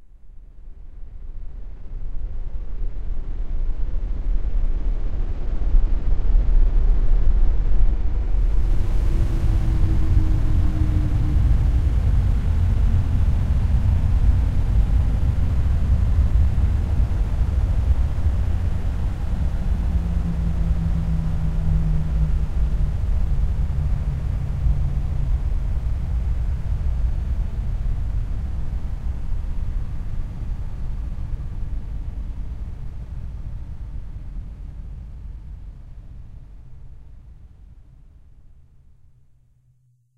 Custom ambiance sound. Made in Audacity.
aftermath, ambiance, blow, cloudy, eerie, ghost, rainy, shuttle, somber, space, suspence, town, whispy, wind